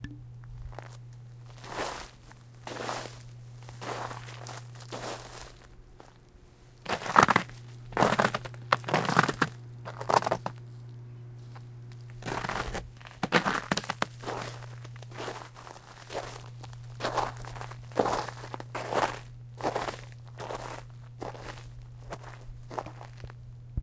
FSTP SNW noisy
Some footsteps I recorded. They are a little noisy (sorry), but I found them useful. This one has some footsteps through snow.